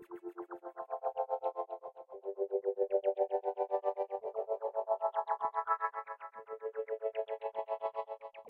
Created with a miniKorg for the Dutch Holly song Outlaw (Makin' the Scene)
arp, rhythmic, loop, psychedelic, ambient, synth